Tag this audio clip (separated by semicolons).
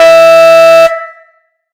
Fire; Noise; Alarm; Attack; War; Fiction; Emergency